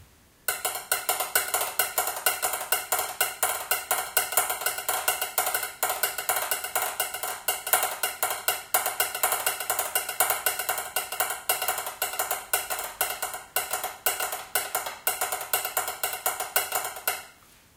CLICK RHYTHM 01
A set of rhythms created using kitchen implements. They are all unprocessed, and some are more regular than other. I made these as the raw material for a video soundtrack and thought other people might find them useful too.
beats, clicks, improvised, rhythmic